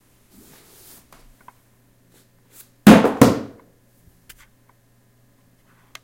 So i took a book and dropped it on the wooden floor